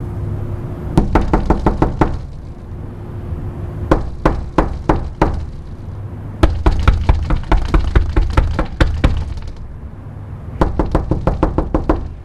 knock on glass
knocking on glass
knock, glass, door